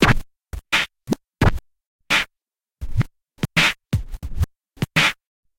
Scratched Beats 011
Scratching Kick n Snare @ 86BPM